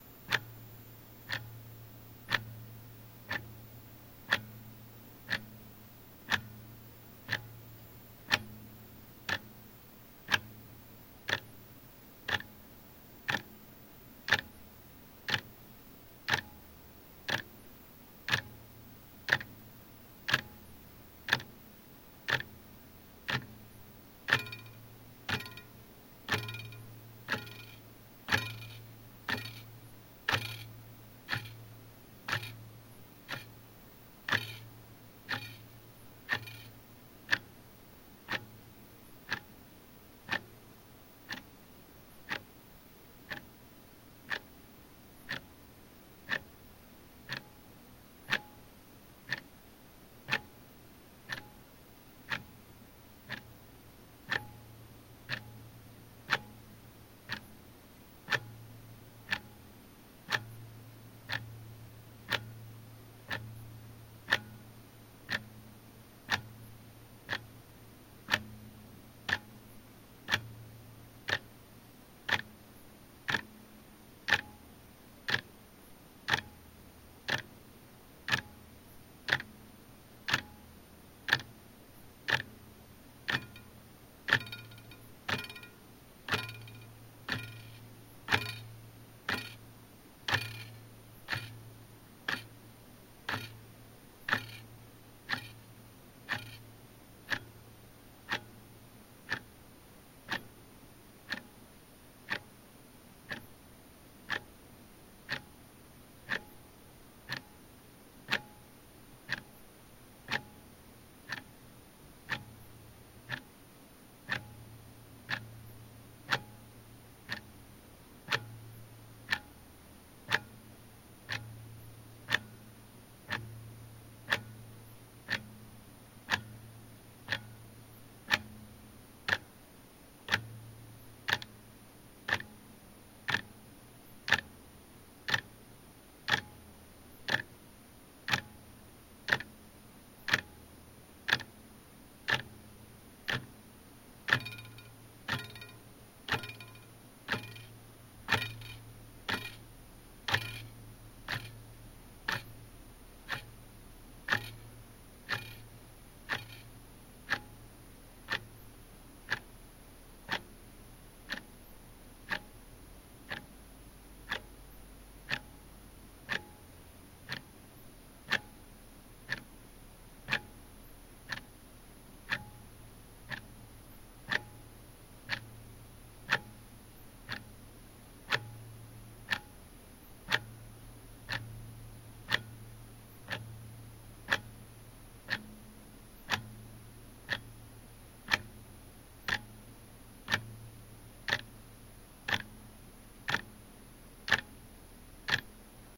Timex analog office clock, rear perspective, more pronounced mechanical ticks with occasional second hand vibration
Recorded in mono 44.1/16 from an Earthworks SR69 into a Zoom H4n, trimmed with a bit of gain boost and a bit of noise filtering